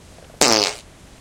fart poot gas flatulence flatulation explosion noise weird
explosion, fart, flatulation, flatulence, gas, noise, poot, weird